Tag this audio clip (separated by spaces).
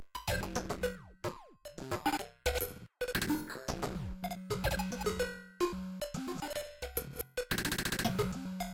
sounds weird beats